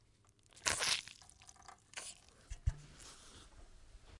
Splash and squishy gross sound
Dirty sounding squishing sound made with an orange being pressed very close to the mic. Can be used for anything from blood splatters or brain exploding, or just a tomato being cut into pieces.
blood, dirty, fruit, gross, slush, splash, splat, splatter, squick, squish